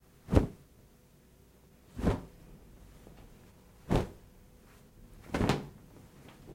Blanket Throwing
Foley recording of throwing around a blanket
blanket, cloth, clothing, fabric, fold, folding, foley, woosh